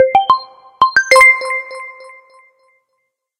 131659 bertrof game-sound-intro-to-game & 80921 justinbw buttonchime02up 12
attention, chime, sound